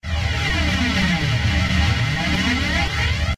sound-design created with a lot of flange and phase processing in Adobe Audition
dark, electronic, industrial, panning, sound-design, stab